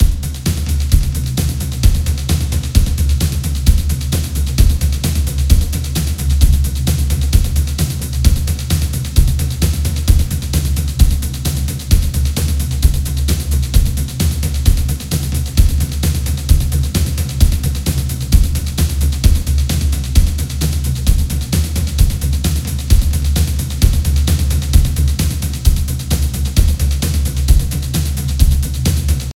DrivingRockBeat 131BPM
A driving rock beat in the style of modern day rock such as Muse or Nickel Back.
131, BPM, Back